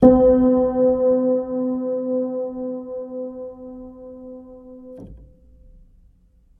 Upright Piano Noise 09 [RAW]
Some raw and dirty random samples of a small, out of tune Yamaha Pianino (upright piano) at a friends flat.
There's noise of my laptop and there even might be some traffic noise in the background.
Also no string scratching etc. in this pack.
Nevertheless I thought it might be better to share the samples, than to have them just rot on a drive.
I suggest throwing them into your software or hardware sampler of choice, manipulate them and listen what you come up with.
Cut in ocenaudio.
No noise-reduction or other processing has been applied.
Enjoy ;-)